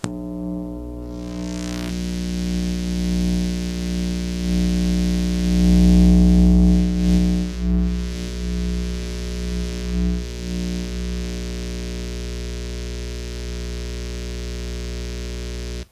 Recording direct to drive and slightly processed with Cool Edit 96 using an old telephone pickup used for recording phone calls. When placed near a source of electromagnetic radiation it produces sound. Held 6" from microwave... scary.
interference, magnetic, microwave, pickup